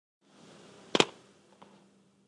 dhunhero slammouse1
Basically the same as laptop slam, but with my computer mouse instead of my hand that's hitting the laptop.
slam, thud